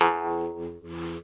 Jew's harp sigle hit
tongue, jews-harp, folklore, mouth-harp, folk, vargan, lips